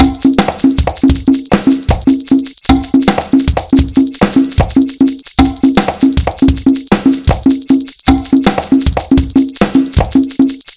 A drum pattern in 7/8 time. This is my second pack.
07, 07-08, 08, 7-8, 8, drum, full, kit, pattern